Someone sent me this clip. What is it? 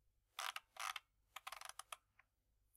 A recording of scrolling two times down and one up, on a logitech cordless optical mouse.
Recorded with a superlux E523/D microphone, through a Behringer eurorack MX602A mixer, plugged in a SB live soundcard. Recorded and edited in Audacity 1.3.5-beta on